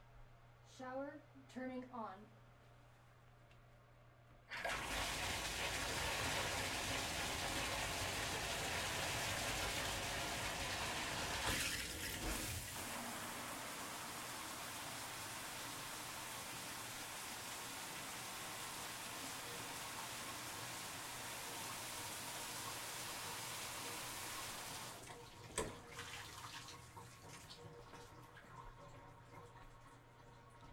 Shower Turning On - This is a sound of a shower turning on and off. This shower is a very high pressure shower.
bathroom, drip, running, shower, water